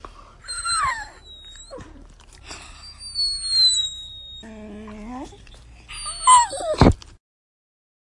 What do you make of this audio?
Llanto de un perro
Dog crying because he wants to be unleashed
sad, Dog